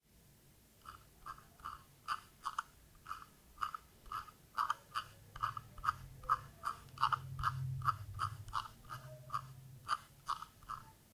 019 - Mose wheel scroll 2.L
sound of mouse scroll wheel clicks